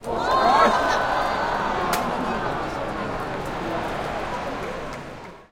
nagoya-baseballregion 11
Nagoya Dome 14.07.2013, baseball match Dragons vs Giants. Recorded with internal mics of a Sony PCM-M10
Ambient, Baseball, Crowd, Soundscape